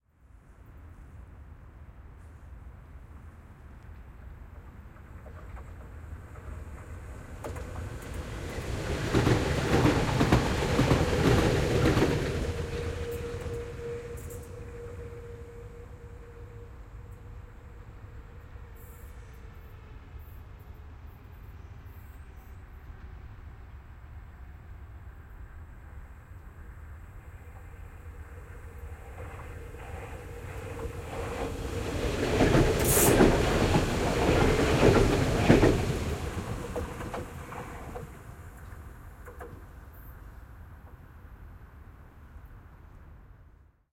HÉV 2 Trains pass by city CsG
trains, city, field-recording, passby, train, rail